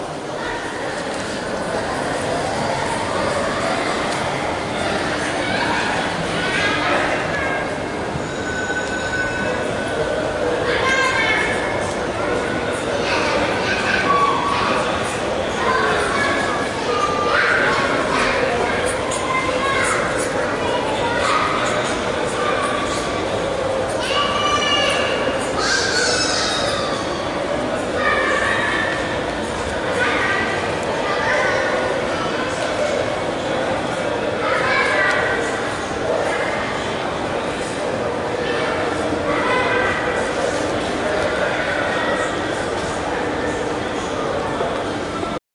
Shopping Mall noise 1
Noise recorded at Manufaktura- Shopping Mall in Łódź, Poland
It's not reminded by any law, but please, make me that satisfaction ;)
ambience, center, children, city, d, field-recording, kids, mall, noise, people, poland, shopping